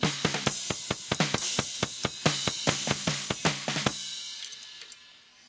An assortment of strange loopable elements for making weird music. This is from a cassette 6 track recording from 1993.
drum, lofi, loop, percussion